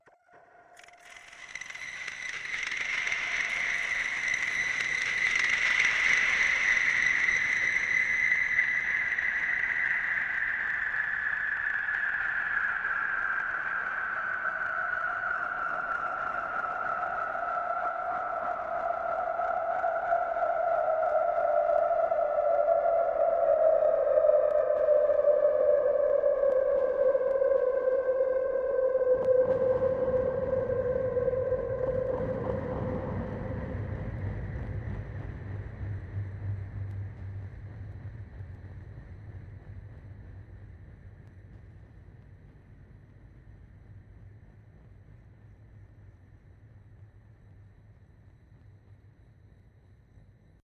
Sounds that I recorded from machines such as tyre alignments, hydraulic presses, drill presses, air compressors etc. I then processed them in ProTools with time-compression-expansion, reverberation, delays & other flavours. I think I was really into David Lynch films in 2007 when I made these...